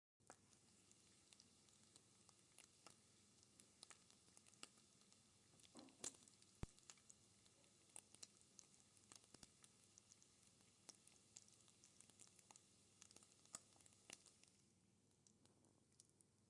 The sound of bacon on a frying pan
Food, Fried-Food, Fried, OWI